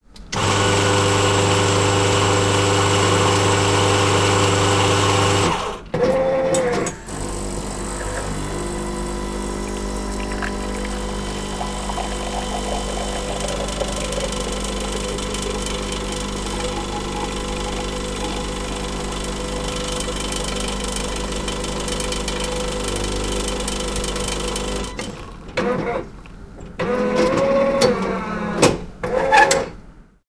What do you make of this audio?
The coffee machine at the office where I work makes a lot of noise during the 30 seconds it takes for it to brew one cup of regular coffee. The recording was made with my H2 an early morning in January 2009.
noise, machine, coffee